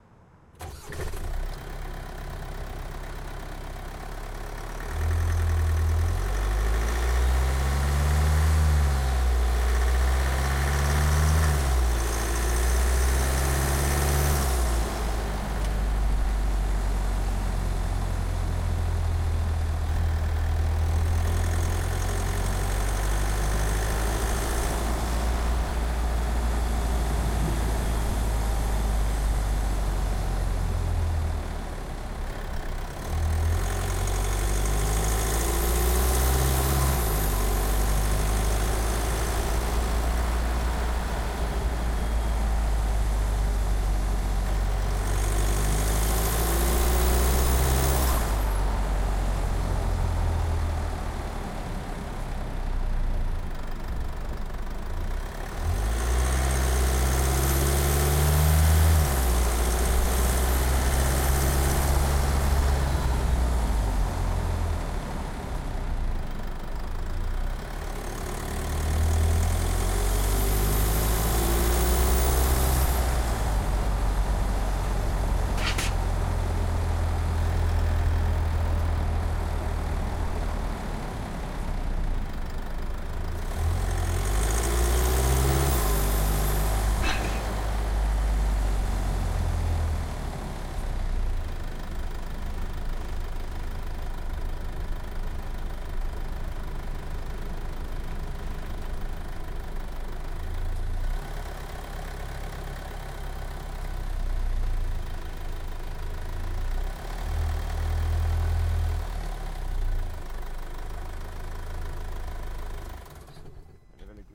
This recording i did to a short film, it is a miks of several microphones in motor biheind the car and near the wheel. I used dpa dpa lavalier mkh 406 schoeps cmc 5 and mkh 60.